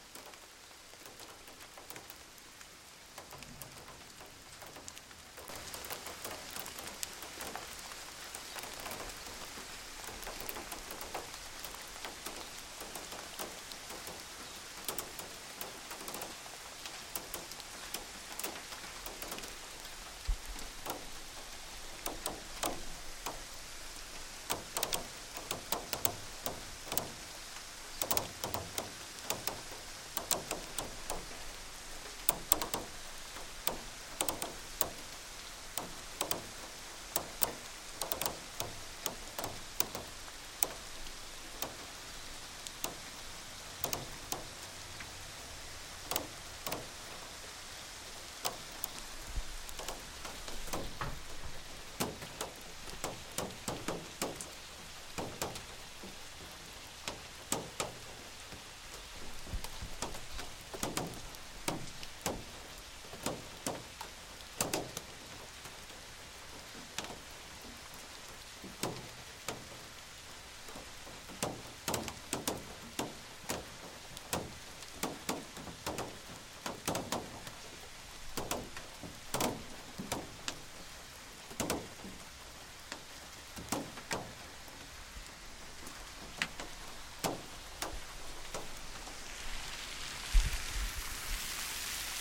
Raindrops in the car雨滴在车上
raindrops, rain, car